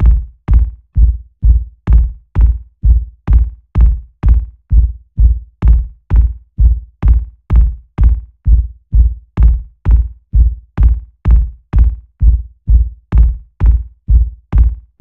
Experimental Kick Loops (13)
A collection of low end bass kick loops perfect for techno,experimental and rhythmic electronic music. Loop audio files.
drum-loop, percs, end, bass, BARS, 4, groovy, sound, groove, kick, rhythm, drum, 120BPM, 2BARS, Low, Techno, loop, dance, beat, rhythmic, percussion-loop, design